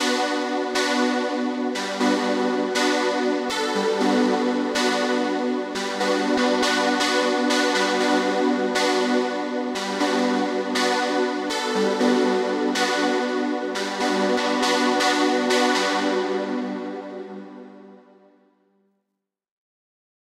120 bpm. Key unknown. Created in Reason.